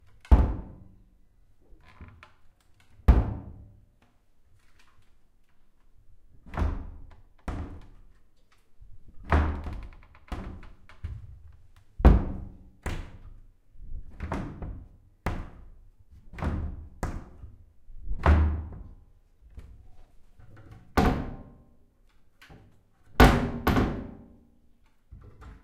door wood heavy open close soft slide thuds roomy
open, door, wood, heavy